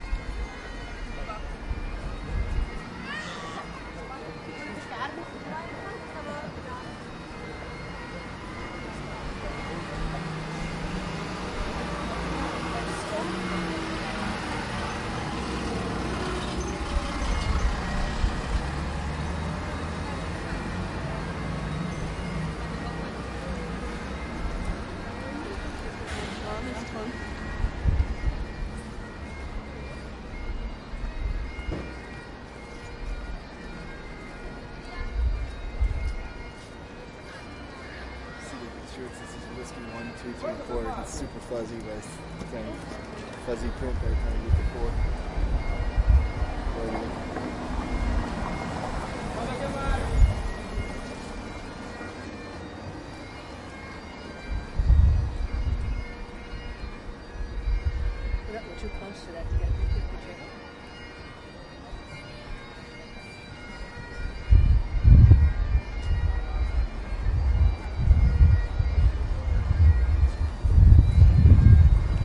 An open air recording of the sounds of the city in Edinburgh Scotland in the old city.